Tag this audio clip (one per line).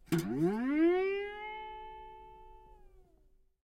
toy
cartoon
toy-guitar